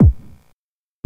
Classic TR-909 hits Made with my Roland JdXi synth